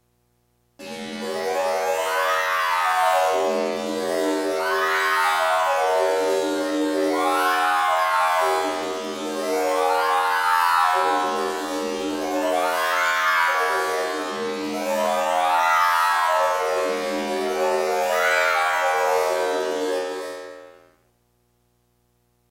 SCI-FI 1 (electric)
Noise Pattern of electricity sounds made by Korg electribe recorded on audacity.
Strange, Sound-design, Machine, Space, Sci-Fi, lab, noise, Synthetic, science